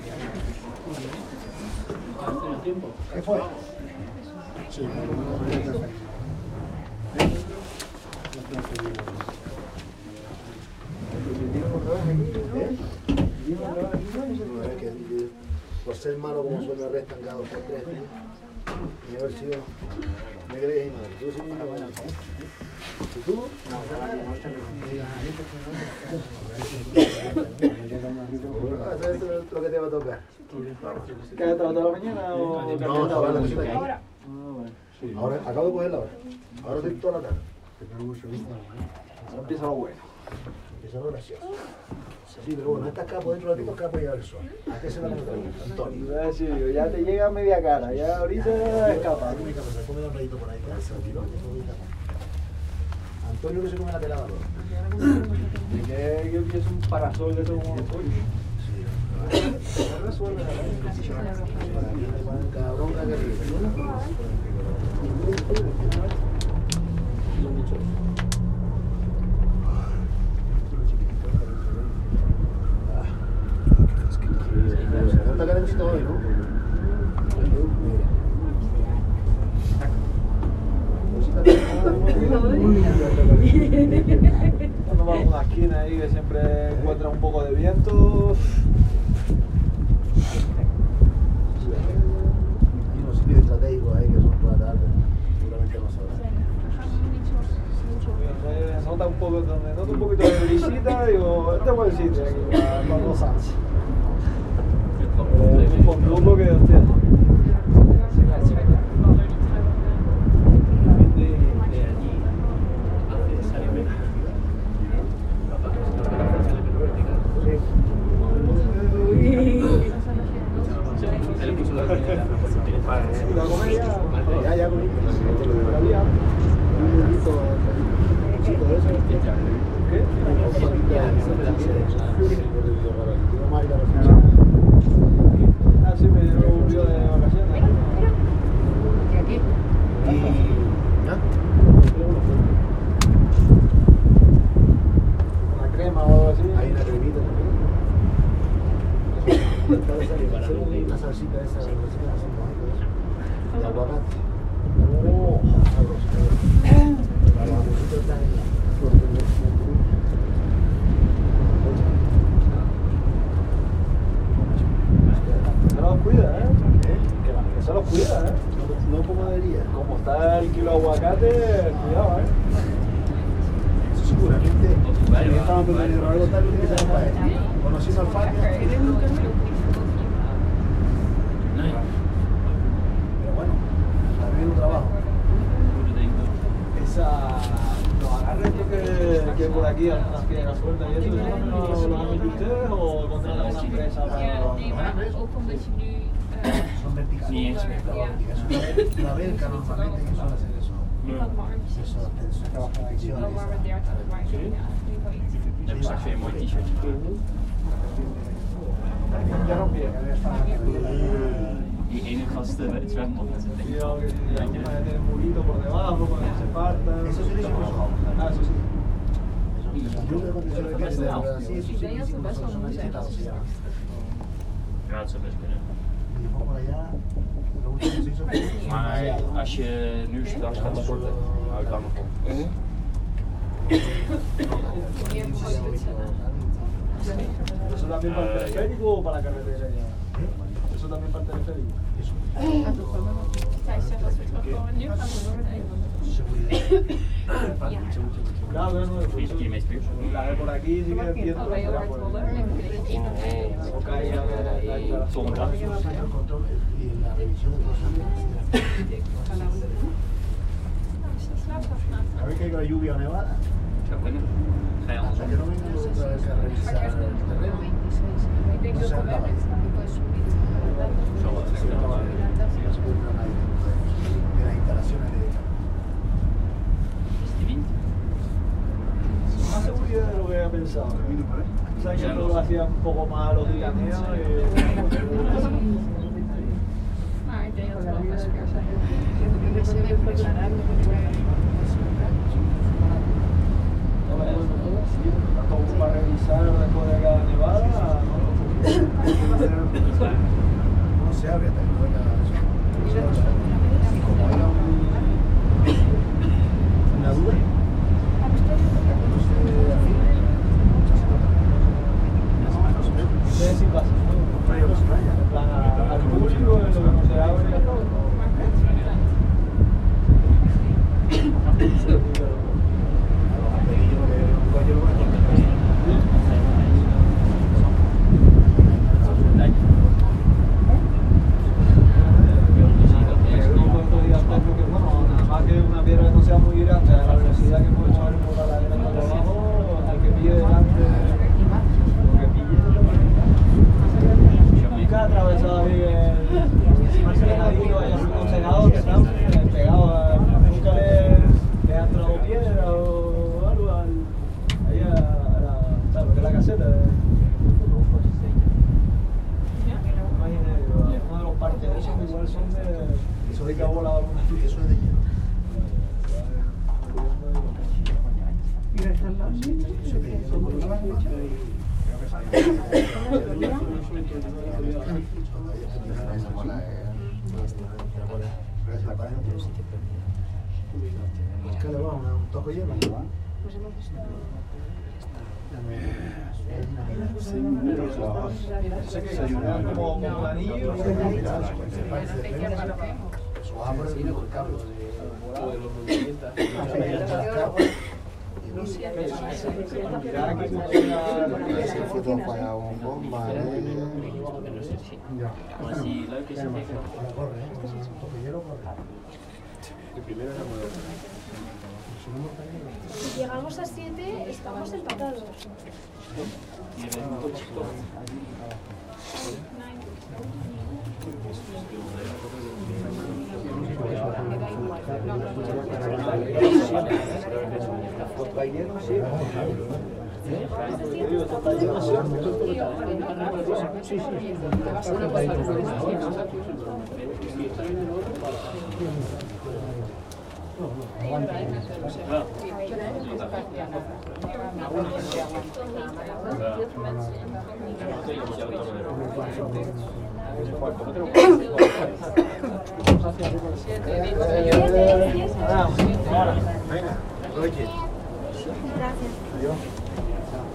The sound of a cable car journey. This was a cable car in a tourist destination in Tenerife, so there is some background chatter in various languages.
hill, field-recording, background, cable-car